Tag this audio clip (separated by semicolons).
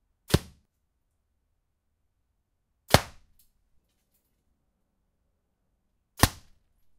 target; arrow-hits-target